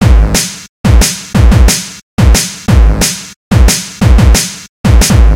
Break180BPM1
A set of Drum&Bass/Hardcore loops (more DnB than Hardcore) and the corresponding breakbeat version, all the sounds made with milkytracker.
break, hardcore, bass, drum, 180bpm